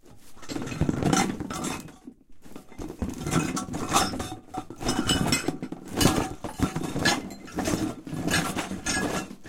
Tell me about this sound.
additional items in cardboard box
box, gestures